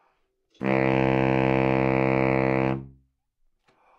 Sax Baritone - C2
Part of the Good-sounds dataset of monophonic instrumental sounds.
instrument::sax_baritone
note::C
octave::2
midi note::24
good-sounds-id::5273
baritone, C2, good-sounds, multisample, sax, single-note